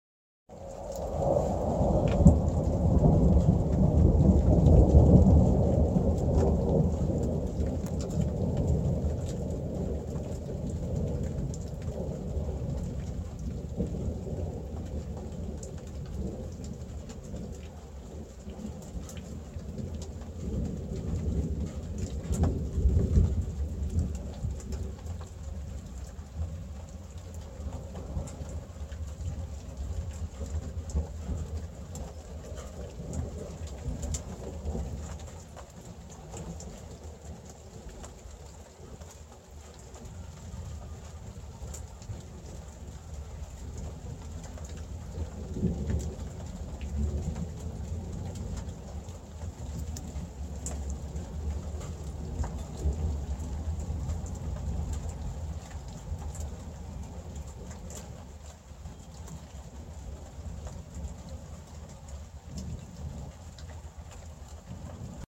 thunder light rain
rolling thunder accompanied by ligth rain
rain, thunder, nature